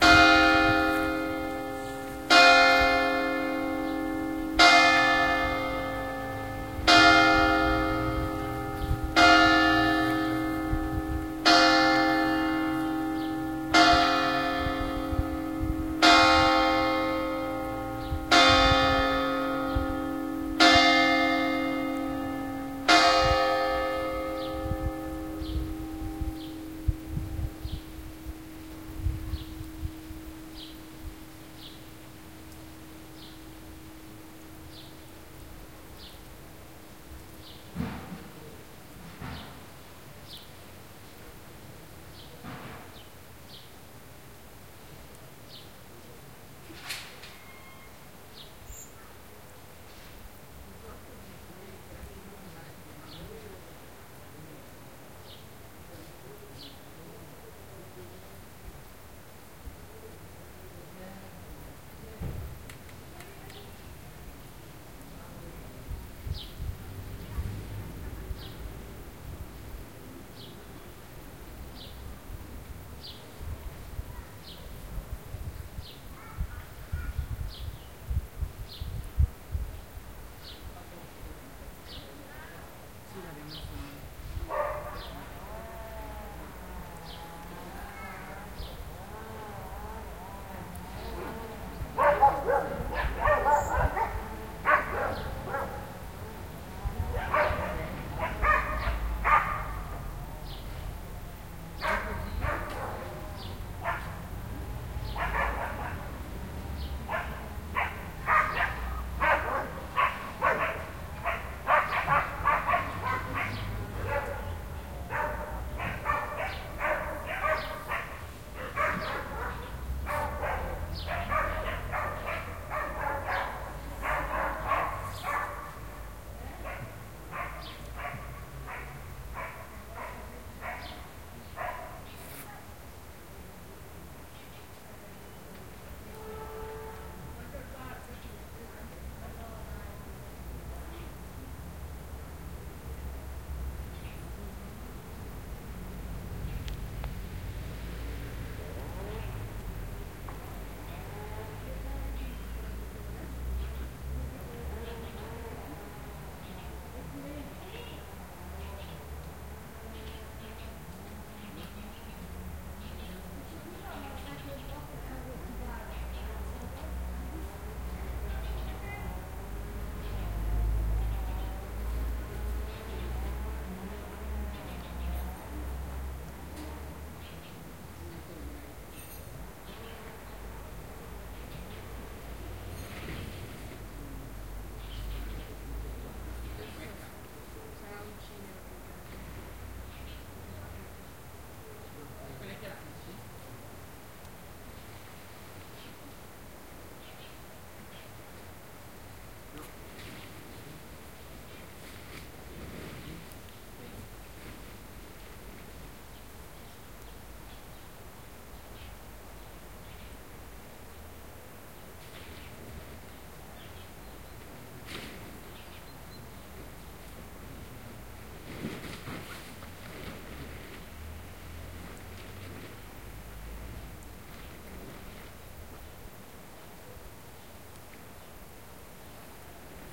2015 Vanzone ChurchBells and Garden Ambience Dogs Barking
Vanzone, atmosphere, bark, bells, church, dog, field, field-recording, italy, mountains, recording, ringing, village